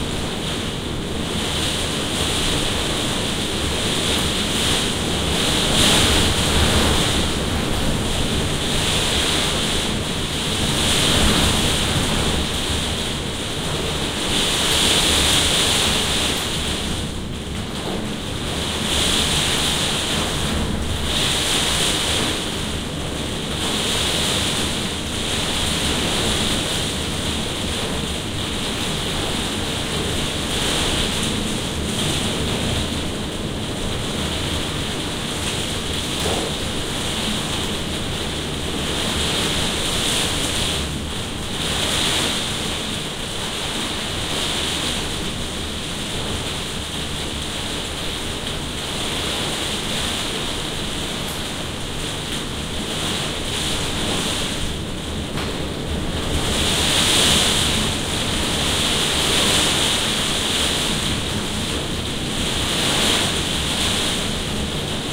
Rainstorm against windows
A rainstorm battering against the windows and roof of a conservatory with water dripping from a leak in the roof.
Recorded on a ZOOM H4n
Conservatory, Dripping, Glass, House, Rain, Storm, Weather, Window